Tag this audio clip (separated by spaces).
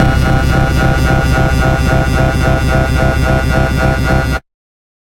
110 bass beat bpm club dance dub dub-step dubstep effect electro electronic lfo loop noise porn-core processed rave Skrillex sound sub synth synthesizer techno trance wah wobble wub